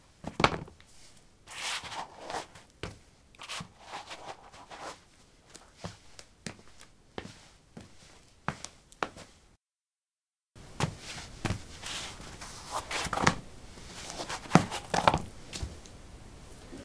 concrete 06d ballerinas onoff
Putting ballerinas on/off on concrete. Recorded with a ZOOM H2 in a basement of a house, normalized with Audacity.